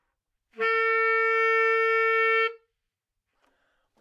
Sax Baritone - D2
Part of the Good-sounds dataset of monophonic instrumental sounds.
instrument::sax_baritone
note::D
octave::2
midi note::26
good-sounds-id::5341
baritone, D2, good-sounds, multisample, neumann-U87, sax, single-note